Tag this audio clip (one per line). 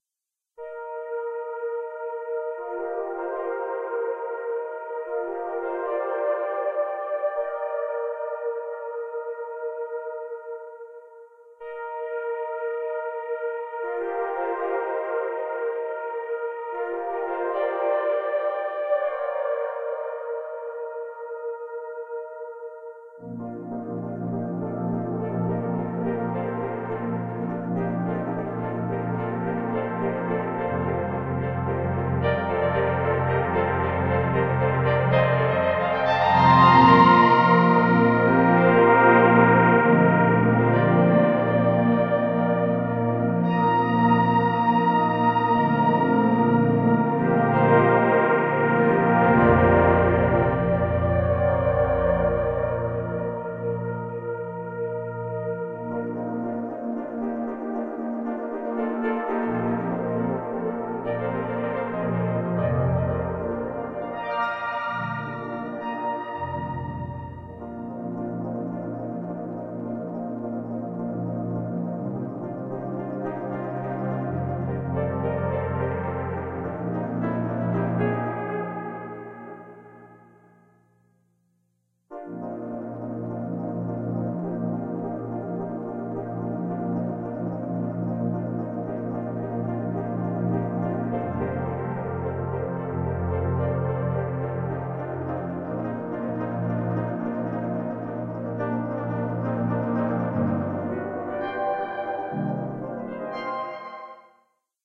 atmospheric
callsign
synth